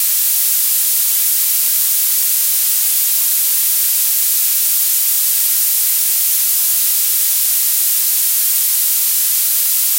Violet Noise 10 seconds
Noise, Radio, Violet